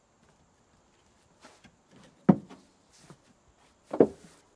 Man with Cane Comes Down Wooden Stairs
Man with a cane walks down stairs outside.